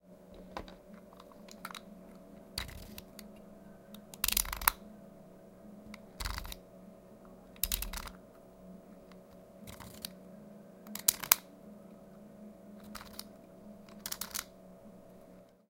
Me opening and closing a stanley knife, nice!